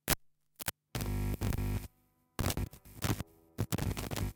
bad contact 1
this is the sound of a fake contact in electrical chain
CLICK, ELECTRICITY, CONTACT